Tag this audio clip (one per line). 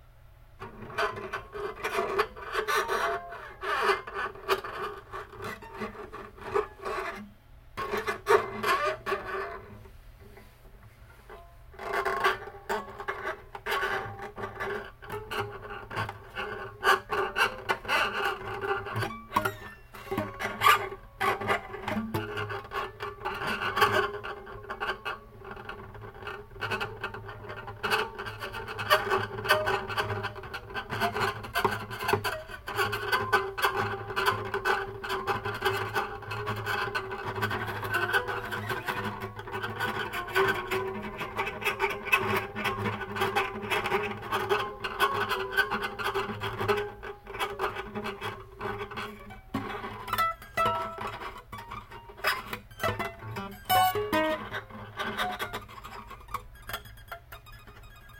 fx
guitar-scratch